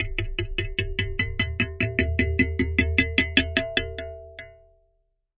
tweezers bounce 2
Tweezers recorded with a contact microphone.
effect, contact, close, sfx, sound, microphone, soundeffect, metal